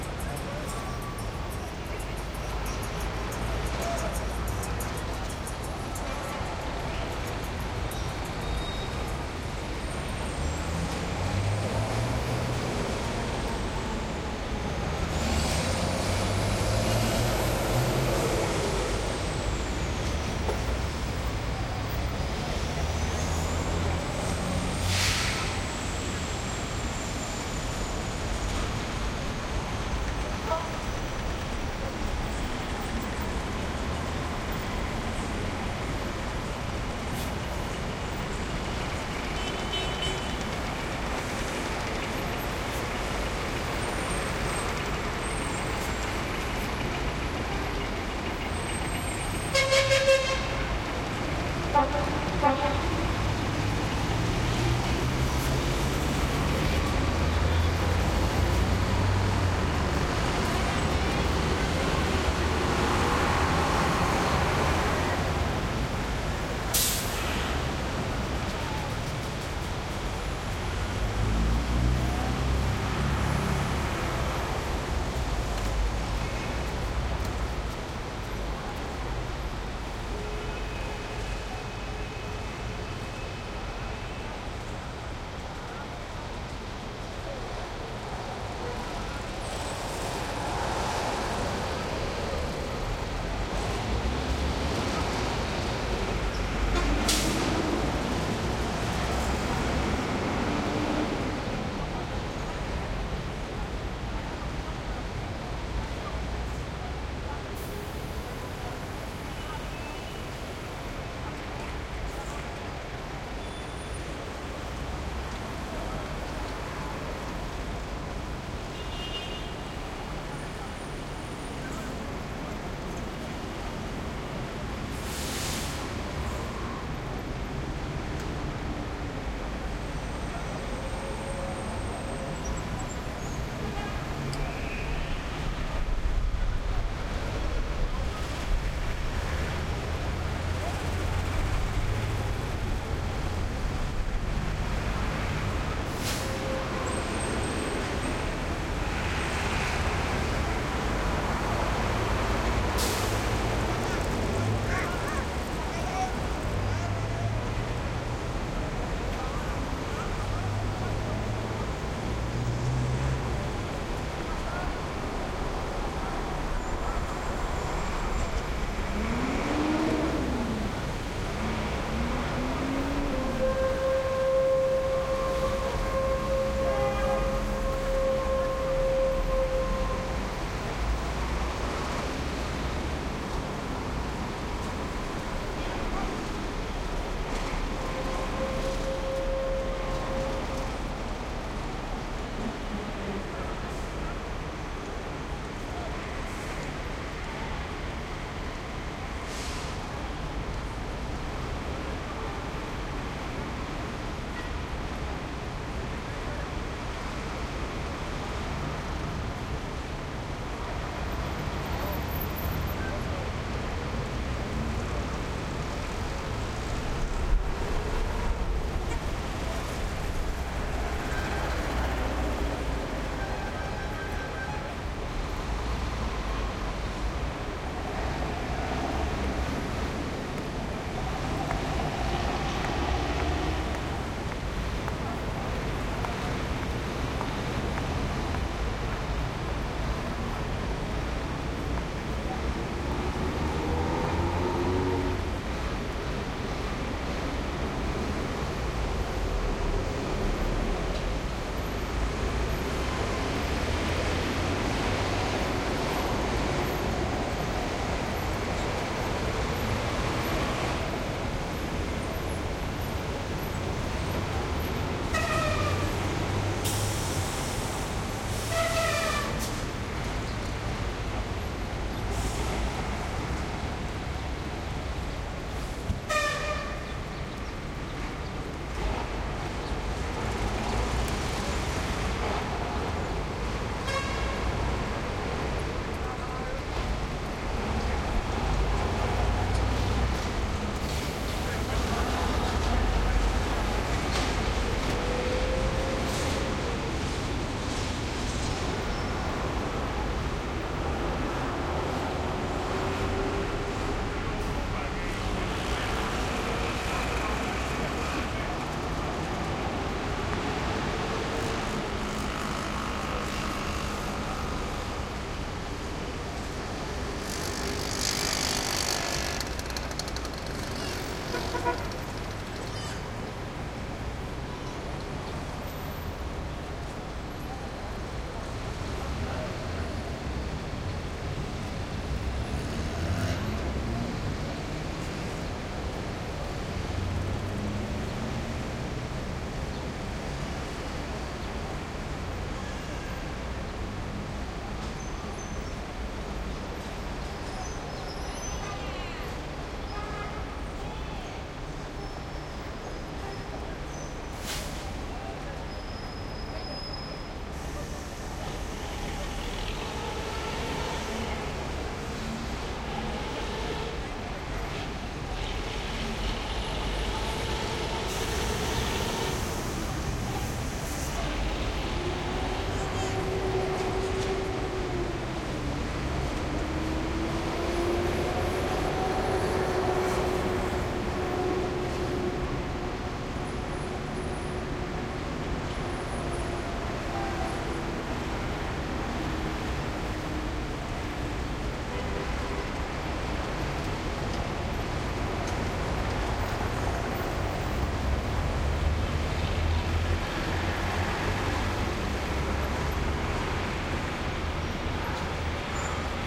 traffic heavy around city square +truck echo middle Havana, Cuba 2008
city, Cuba, heavy, square, traffic